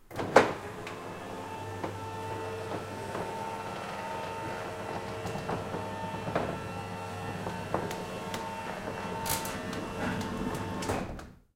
Electric Roller Door DOWN
This is an electric roller door going down. This is a stereo recording using a Rode NT-4 connected to the mic in of an Edirol R-09.
door-close, electric-door, field-recording